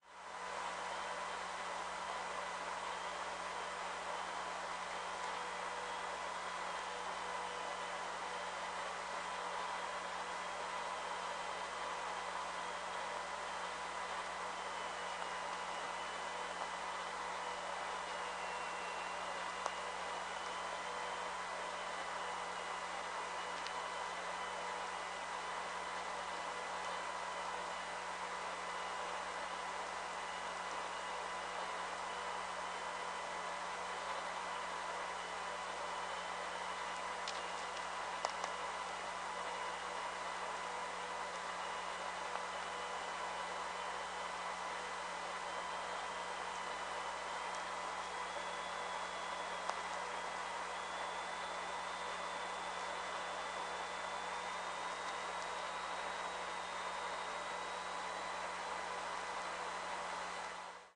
inspire 27Dec2007-07:00:01
offers a public continuous source of audible signal in VLF band direct of our ionosphere.
In this pack I have extracted a selection of fragments of a minute of duration recorded at 7:01 AM (Local Time) every day during approximately a month.
If it interests to you listen more of this material you can connect here to stream:
radio, electronic, vlf, static, shortwave, noise